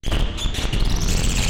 A cheap Behringer Mixer and a cheap hardware effects to create some Feedbacks.
Recorded them through an audio interface and manipulated in Ableton Live with a Valhalla Vintage Verb.
Then sound design to have short ones.
Mixer, Electronics, Feedback